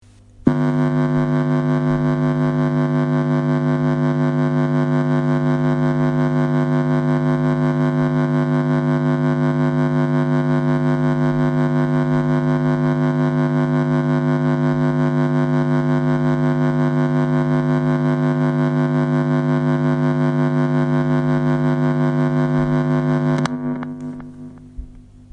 Tremolo Feedback 2
guitar, XLR, microphone, electric, noise, feedback, wave, amplifier, hum, tremolo, effect, distortion, electronic, buzz, machine, freaky